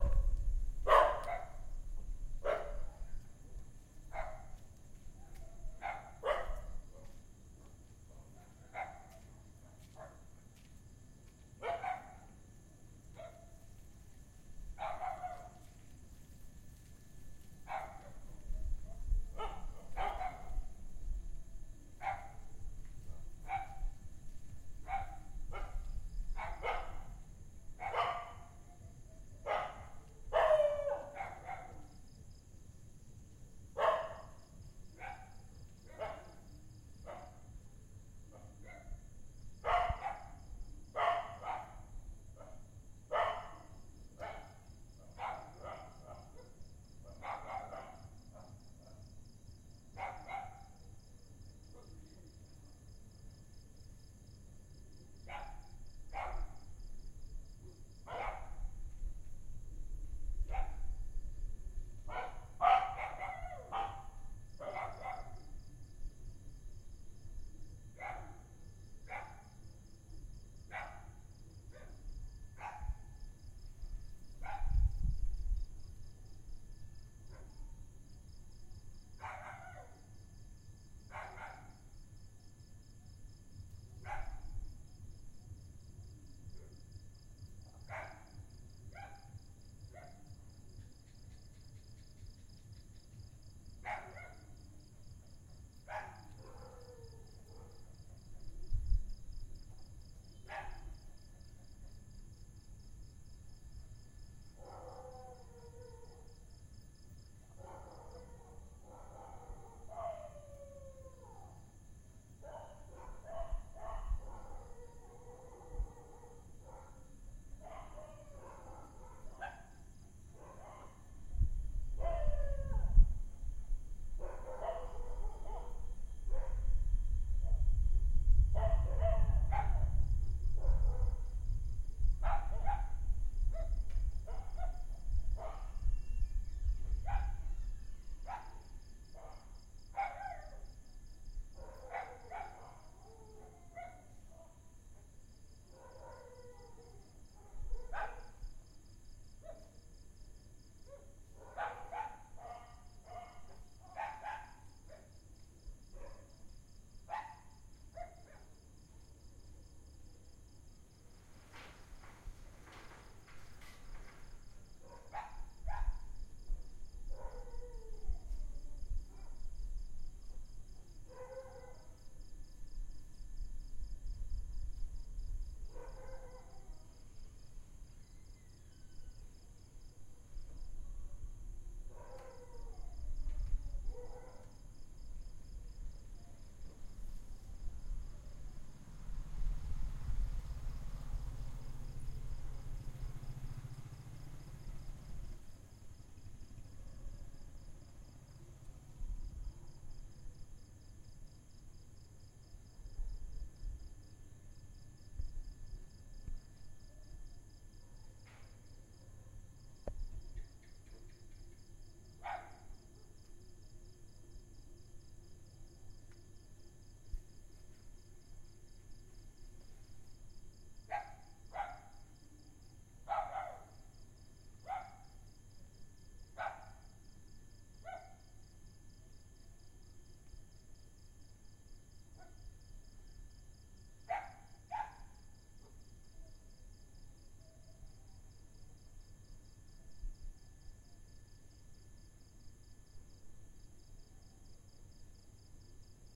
Cambodian Street Dogs Barking at Night
Its night time in Cambodia and a dog close by our house starts barking
This file was recorded with an Zoom H1
ambience, ambient, animal, bark, barking, cambodia, dog, dogs, field-recording, growling, night, sound, woof